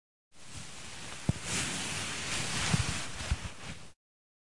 Clothes Movement
cloth, clothes, clothing, moving